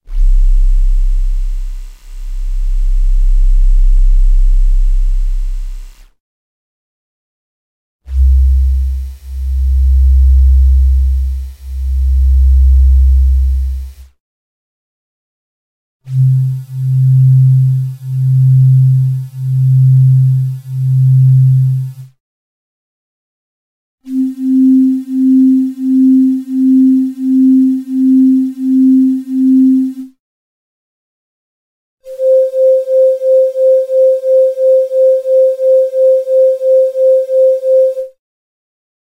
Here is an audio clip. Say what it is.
Preset sound from the Evolution EVS-1 synthesizer, a peculiar and rather unique instrument which employed both FM and subtractive synthesis. This sound, reminiscent of "Vienna" by Ultravox, is a multisample at different octaves.